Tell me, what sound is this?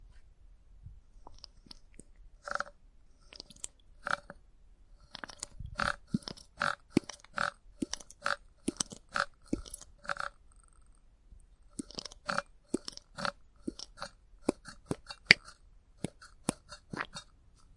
Hand pump oil can squirt 01
Recorded on a Zoom H1n recorder. I squeeze the handle on the oil can and it sputtered oil out.
Spout, Lubricants, Oiler, Grease, Gun, Metal